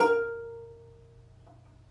Notes from ukulele recorded in the shower far-miced from the other side of the bathroom with Sony-PCMD50. See my other sample packs for the close-mic version. The intention is to mix and match the two as you see fit. Note that these were separate recordings and will not entirely match.
These files are left raw and real. Watch out for a resonance around 300-330hz.
note, room-mic, uke, ukulele